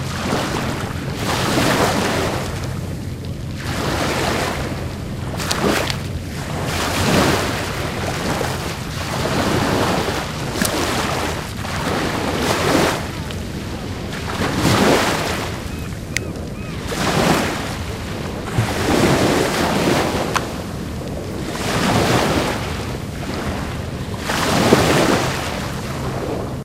Small waves hitting the rocky coast of Seattle's Alki Beach Park. There are a couple of kids throwing rocks into the shallow water. Recorded with a Rode NTG-2.